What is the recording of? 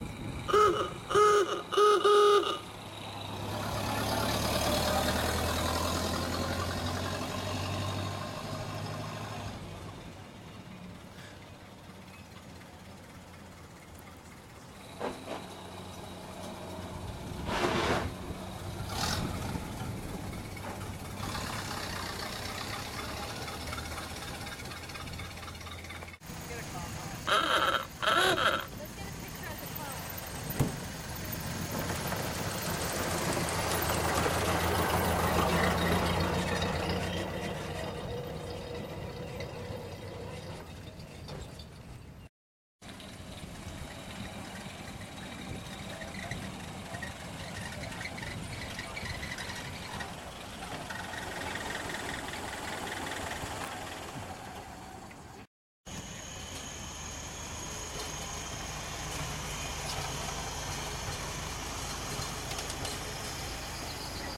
Model A Fords
Model A Ford sounds as they drive. Grinding gears and ooga horns